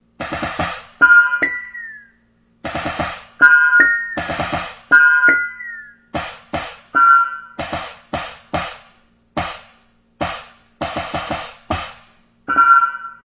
A short clip from my Roland kit. Thanks. :^)